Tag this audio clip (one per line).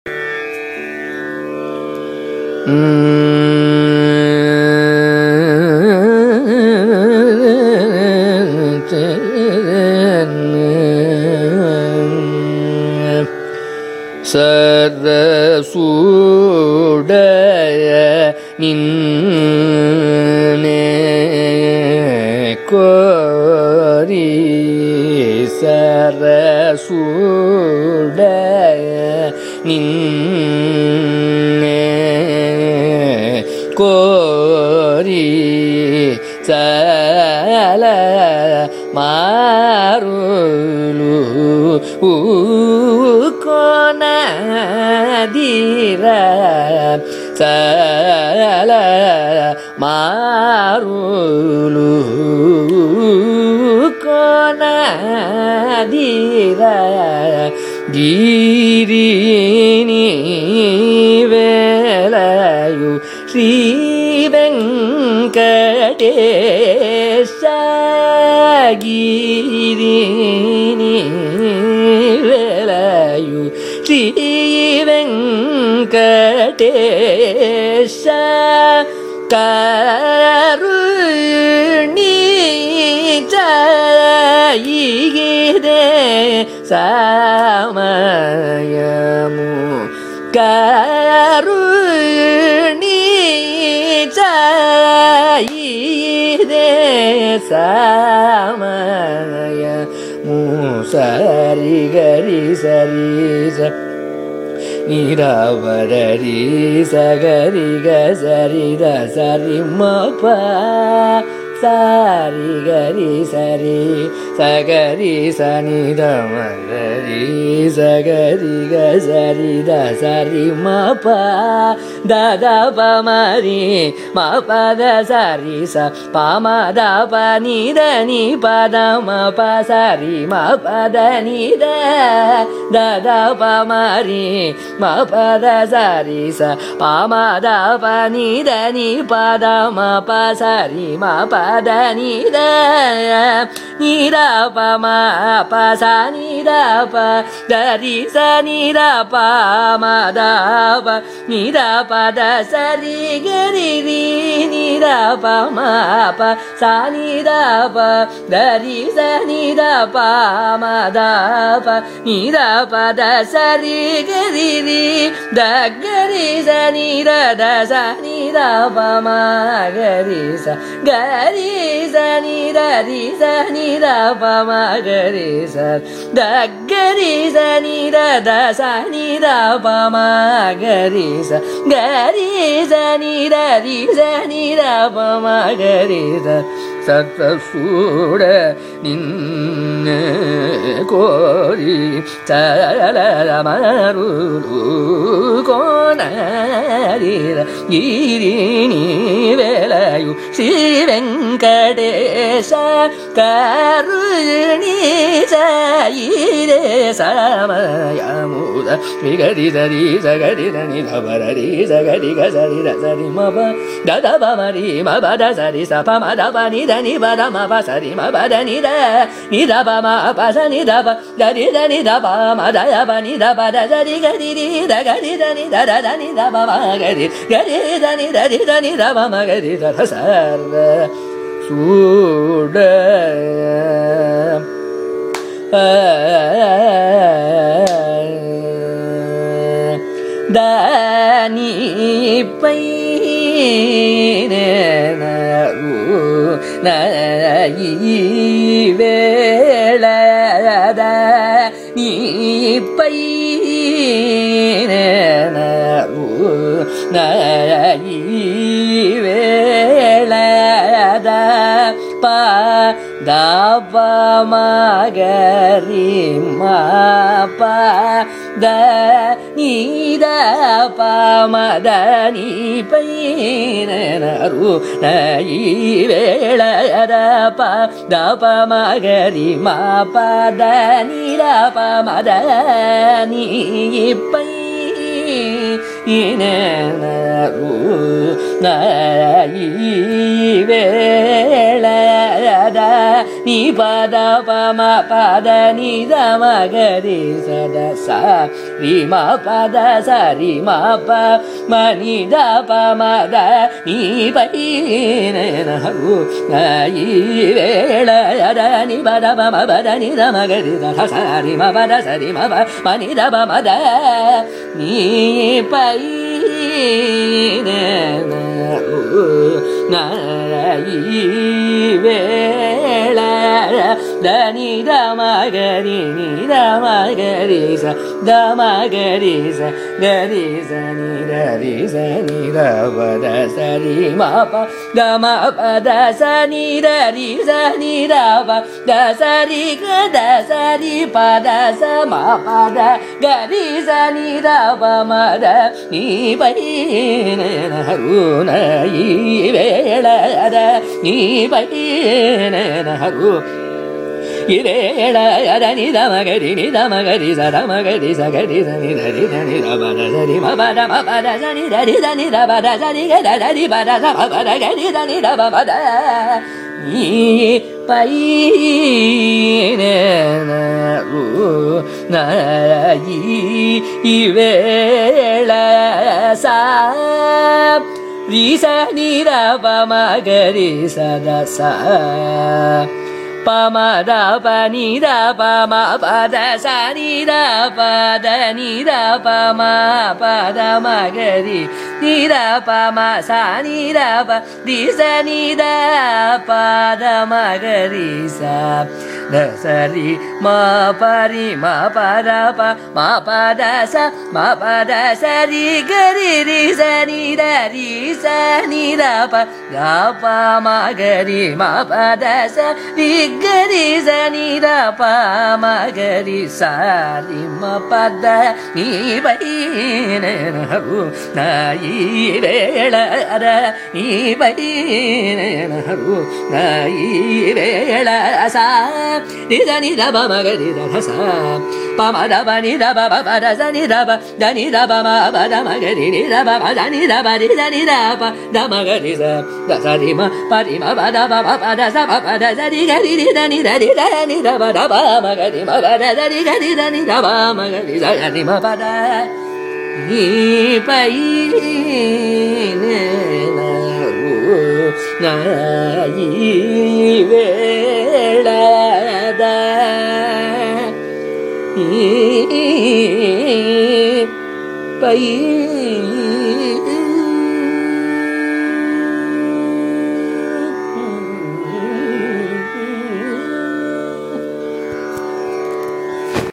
carnatic
compmusic